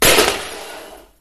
A Small Gun falling on the floor